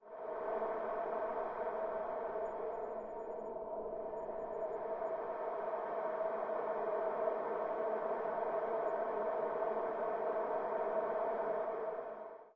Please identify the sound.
Wind sci-fi effect deserted land
White noise processed with TL Space.